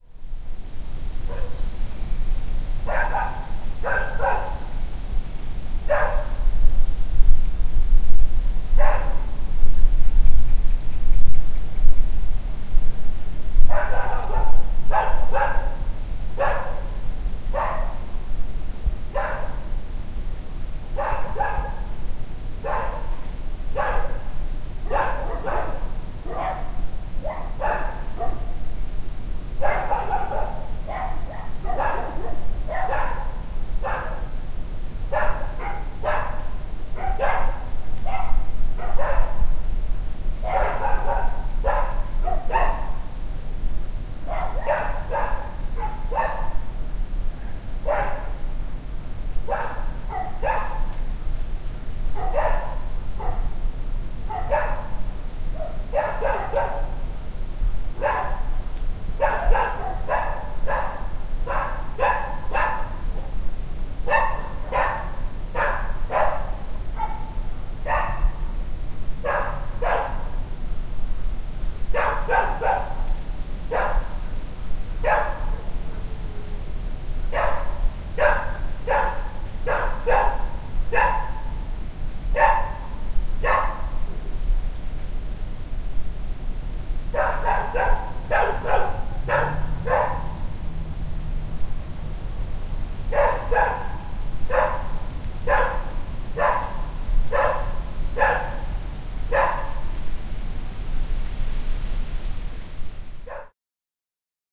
Urban Atmos with Dogs Darwin
Recorded in the tropical city of Darwin - Australia. A daytime urban atmos. Recorded with my Zoom 4n.
ambience, ambient, Atmos, atmosphere, australia, australian, birds, day, field-recording, insects, nature, tropical, tropics, urban